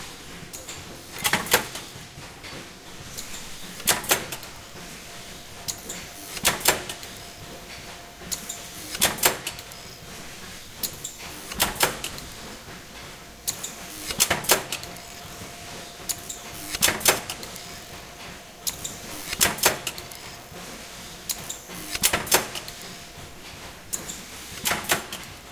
Punch Press, 20 Ton, Clip1
Kenco 20 ton punch press, punching .13” x .024” steel coil, 2 Ø.062” holes and cut to 3’ L. Recorded at a manufacturing factory in stereo with an Edirol R44 recorder and Rode NT4 microphone.
factory, machine, metal, press, punch